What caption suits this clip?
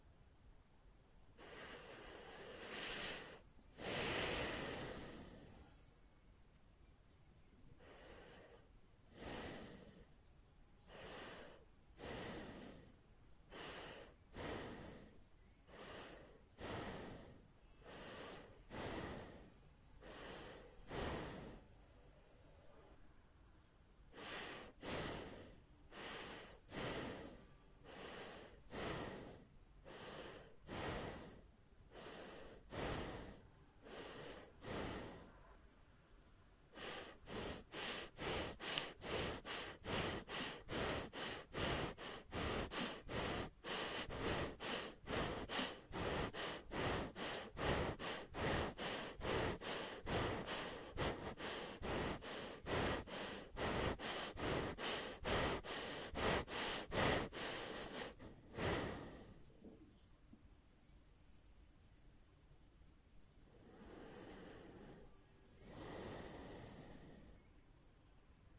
some variations of breathing. a big breath, a slow breath up to a faster breath (good for suspense)
horror, breath, fear, human, sleeping